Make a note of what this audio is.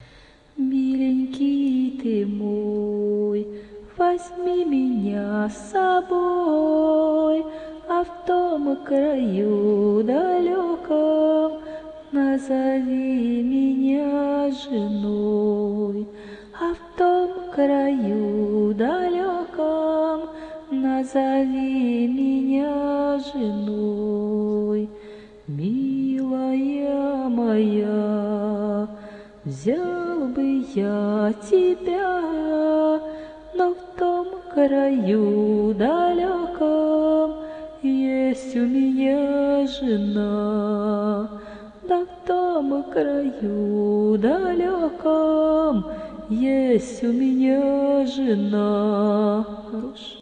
Mama`s songs
mama, ukraine